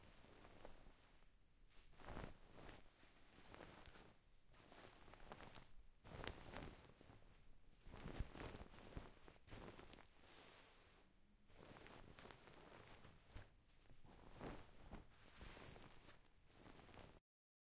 Bed Sheets Rustling
A goose-feather duvet and sheets moving around. Recorded with a Zoom H6 and na XY capsule.
Bed-sheets, field-recording, Movement, OWI, Rustling